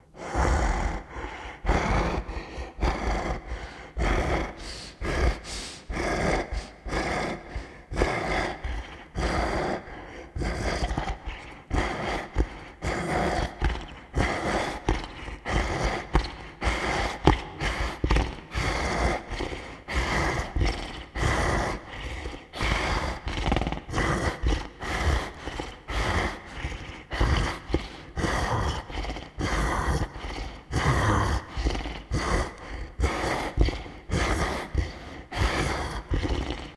Creature Pant (Fast)
A faster growling pant for when the creature is chasing its terrified prey.
scary, growls, panting, creature, creepy, horror, creatures, beast, growl, pant, monster, beasts